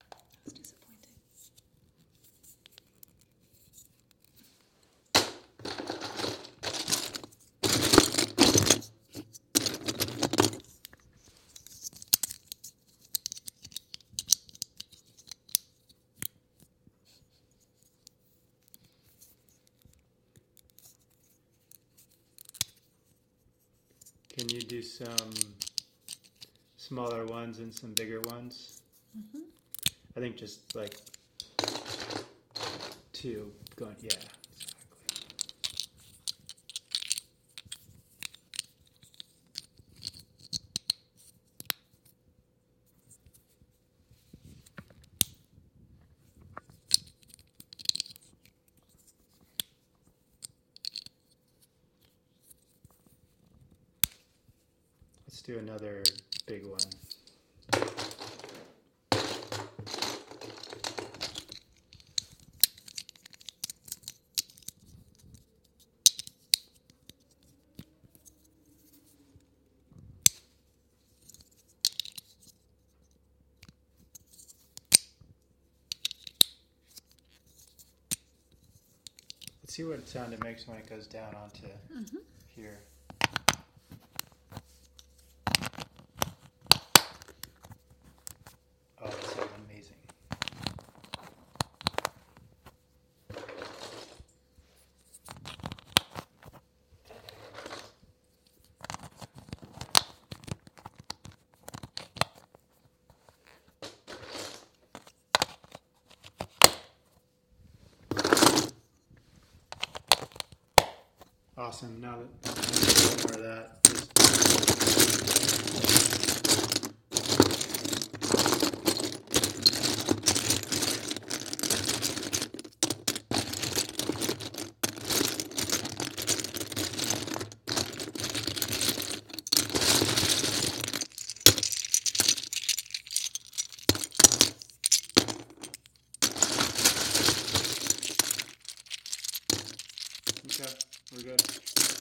Lego Bricks Clicking and Mixing
Lego bricks clicking together and being stirred and moved around in a box. Microphone: Rode NTG-2. Recording device: Zoom H6.
clack, clacking, click, clicking, lego, legos, pile-of-legos